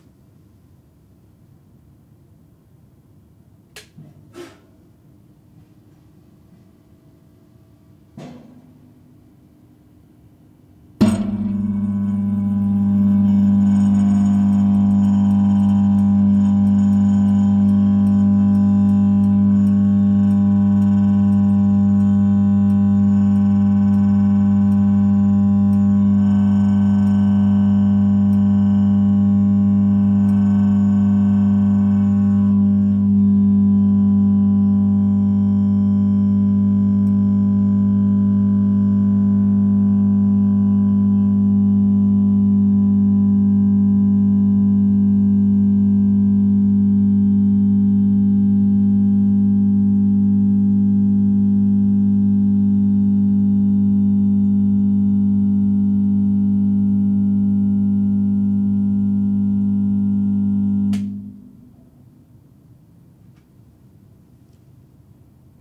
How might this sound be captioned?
Fluro on warmup
fluorescent light bulb, warehouse style, switching on and warming up.